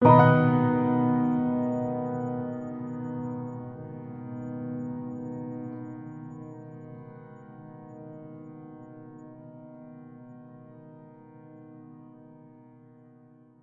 Piano B major 7th chord, 4 octaves
7th, chord, electroacoustic, emotional, major, piano, pretty, stereo